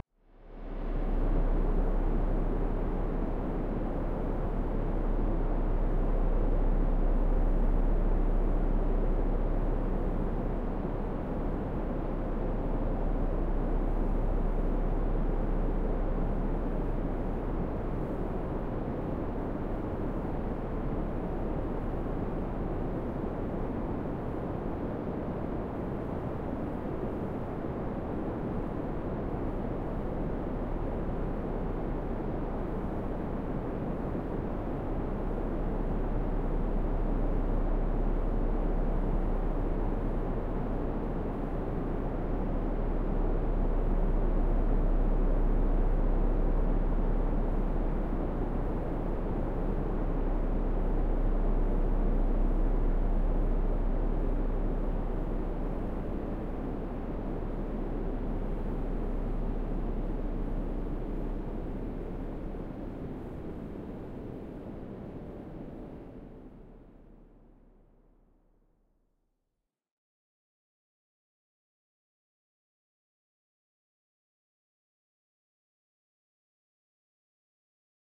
Space ambience: By the sea, atmospheric. Ocean sounds, coast, waves. Recorded and mastered through audio software, no factory samples. Made as an experiment into sound design. Recorded in Ireland.
audio, beach, coast, dunes, field-recording, loop, msfx, ocean, sample, sand, sea, seaside, sea-sound, sfx, shore, water, waves, wind